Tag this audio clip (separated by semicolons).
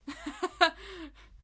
female-voice; fun; happy; laugh; laughter